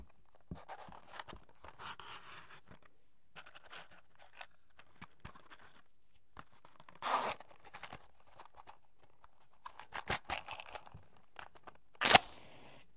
Matchbox and Strike (1)
In this series of recordings I strike many Cook's safety matches, in a small plaster-boarded room. These sounds were recorded with a match pair of Rode M5 small diaphragm condenser microphones, into a Zoom H4N. These are the raw sound recording with not noise reduction, EQ, or compression. These sounds are 100% free for all uses.
flame, match, strike, matchbox, Rode, burning, fire